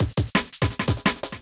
A dnb Beat for any DnB production. Use with the others in my "MISC beat Pack" to create a speeding up beat. Like this, 1,2,3,4,5,6,7,etc.
Beat, Frenetic, DNB